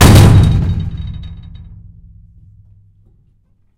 storm door slam 02
A metal storm door on a villa in Civenna, Italy, slams shut. Recorded in July 2012 using a Zoom H4. No processing.
bang, blam, boom, door, gate, metal, shut, slam